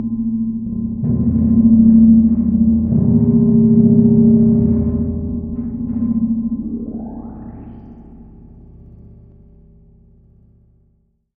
Retro Sci-Fi, horror 02
Playing around with amplitude modulation on a Doepfer A-100 modular synthesizer.
I used two A-110 oscillators.
Spring reverb from the Doepfer A-199 module.
Recorded with a Zoom H-5 in March 2016.
Edited in ocenaudio.
It's always nice to hear what projects you use these sounds for.
Please also check out my pond5-profile for more:
atomosphere, modular, 60s